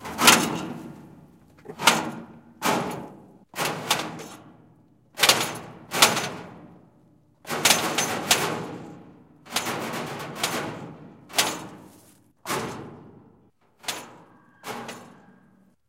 Hitting a tractor from inside.
door, metal, tractor, hit